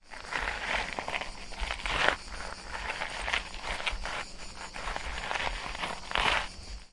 Son d’un pied grattant des pierres sur le sol. Son enregistré avec un ZOOM H4N Pro et une bonnette Rycote Mini Wind Screen.
Sound of stones scrubbed on the floor. Sound recorded with a ZOOM H4N Pro and a Rycote Mini Wind Screen.